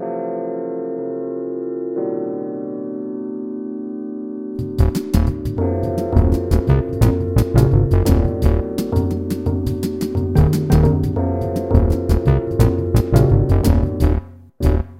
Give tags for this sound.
digitopia-miniatures-competition; dx7; mopho; ableton; yamaha

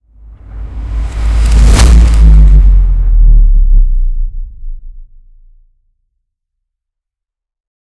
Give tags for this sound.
big; cinematic; door; drop; fx; heavy; hit; huge; impact; large; lordboner101; movie; reverse; slam; thicc; transformers

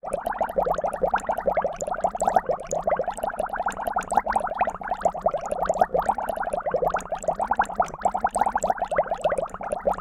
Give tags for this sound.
dripping; drip; water; bubbling; boiling; bubbles; liquid; drops

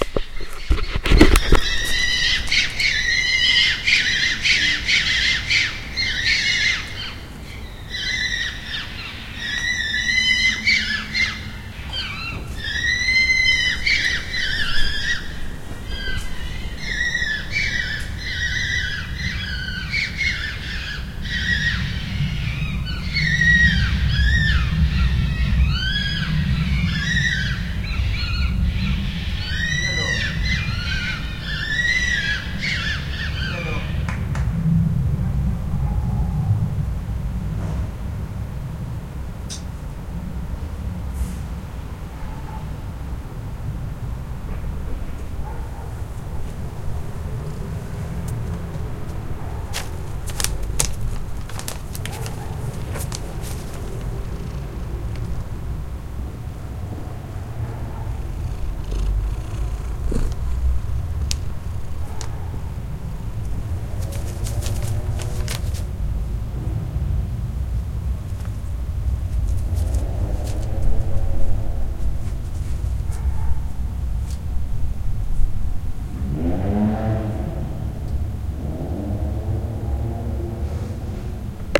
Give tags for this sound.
scream; foxes; night

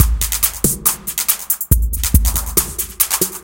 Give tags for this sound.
beat; electronic

